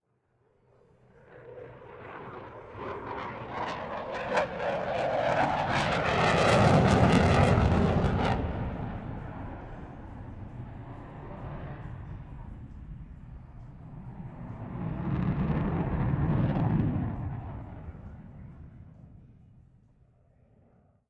Eurofighter Typhoon Manoeuvre – Close Proximity
A recording of a manoeuvre performed by a Eurofighter Typhoon – a modern jet engine fighter airplane – at an airshow in Berlin, Germany. Recorded at ILA 2022.
Typhoon, Engine, Roar, Stunt, Airport, Rocket, Airshow, Flight, War, Fighter-Jet, Flyby, Aircraft, Plane, Jet-Engine, Manoeuvre, Jet, Eurofighter, Aviation, Fuel